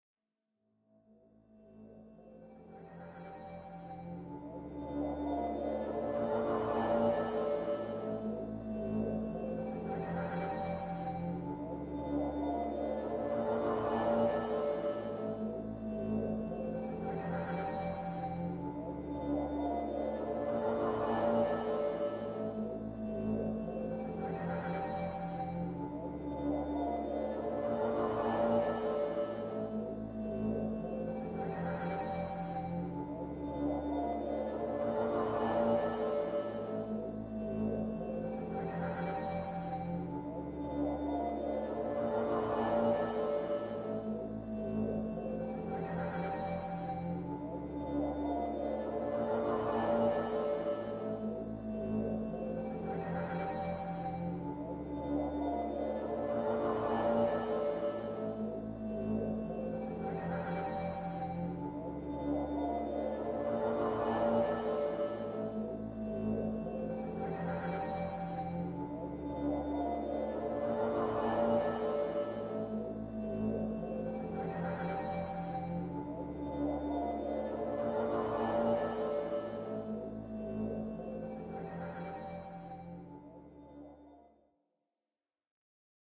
Blade Runner Type Ambient
THE DARK FUTURE
Dark Suspenseful Sci-Fi Sounds
Just send me a link of your work :)
loop, evolving, divine, space, pad, ambient, experimental, drone, soundscape, Future, runner, smooth, artificial, dream, blade, dreamy, multisample